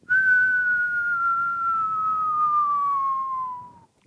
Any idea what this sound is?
A short high whistle